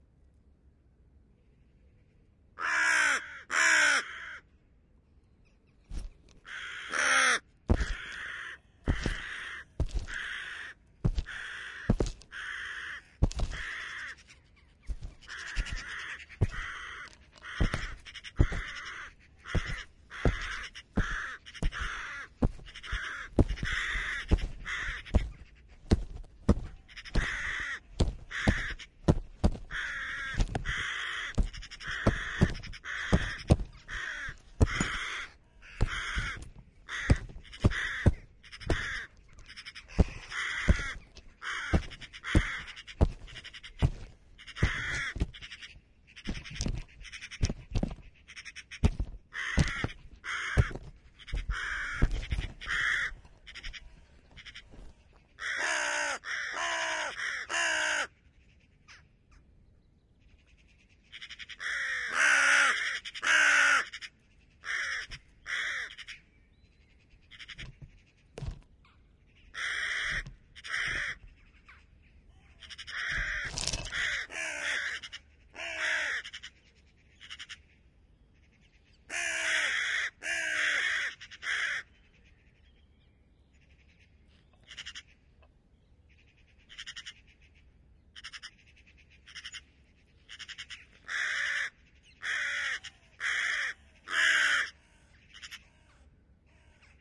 raven attack (No.2)
another recording of ravens attacking a furry mic windshield.
EM172-> TC SK48
Amsterdam, birds, city, picking, ravens, roof